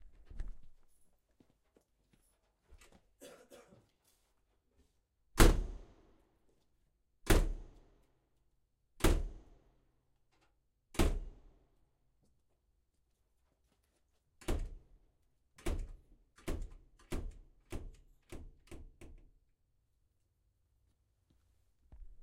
Metallic door open close.
door, hit